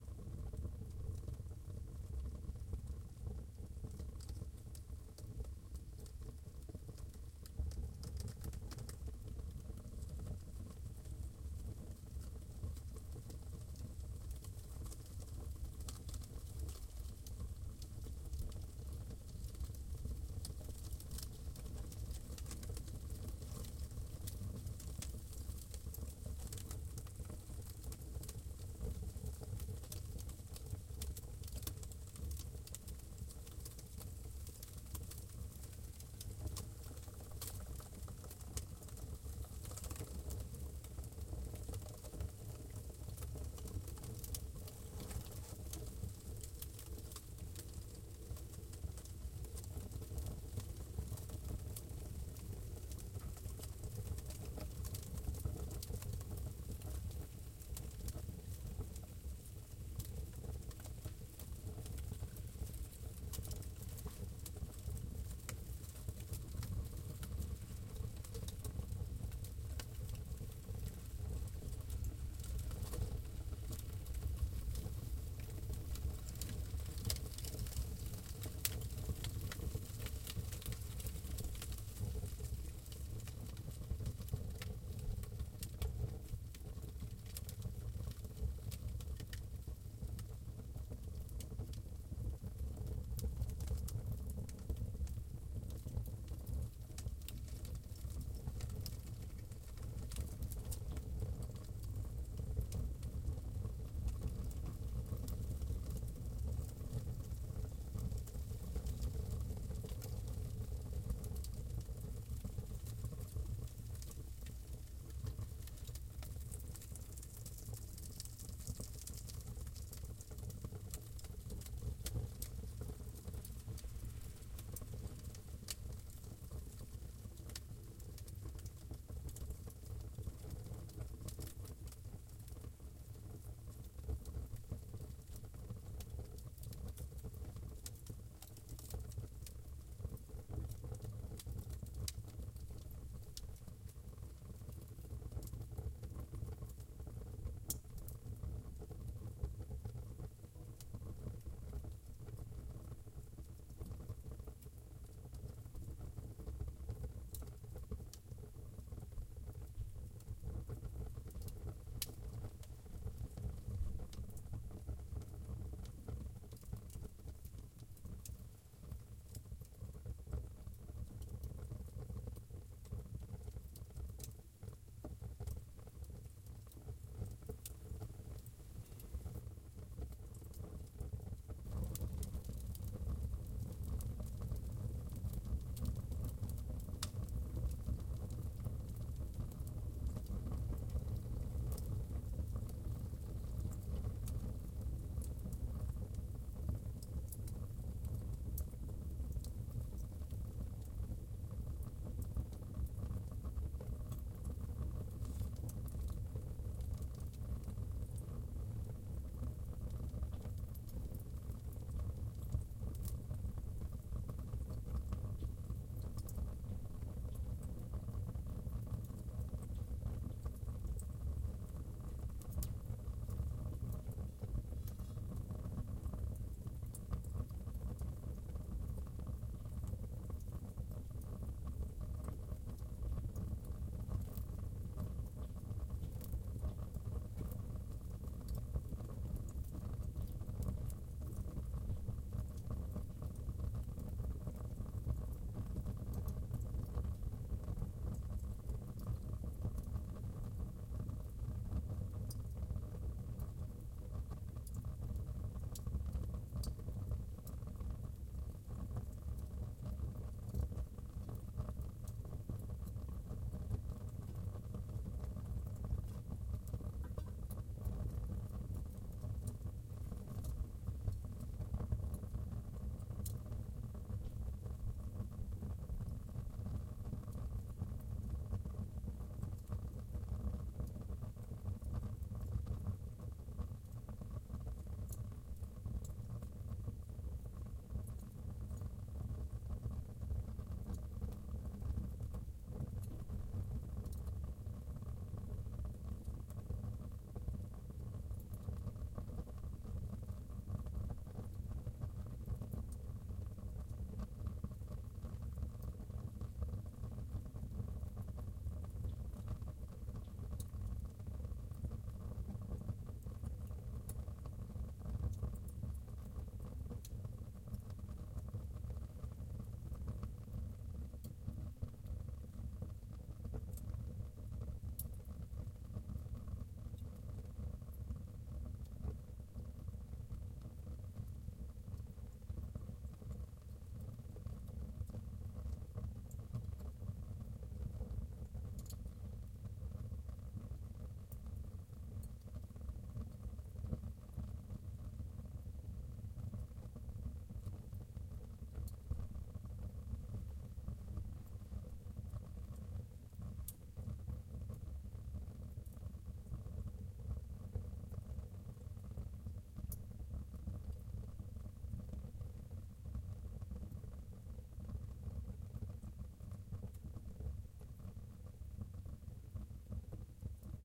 Here's my first field recording and first upload on here.
I recorded my friend's fireplace in his apartment for a theater production through my college for which I was the sound designer and sound board operator.
I used a Zoom Q3HD with its built-in stereo XY microphones at about a foot or so away from the fire (on the bricks directly encasing the fireplace) with some make-shift baffling around the fireplace (safe, I know).
This track is an edited version, a sort of "best of".
indoors, apartment, fire, fireplace, firewood, field-recording